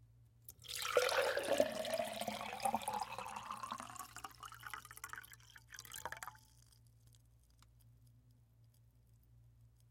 Continuous pour of liquid into empty glass container until glass is full, loud